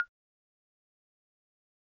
percussion sound in Dminor scale,...
itz my first try to contribute, hope itz alright :)
africa, instrument, percussion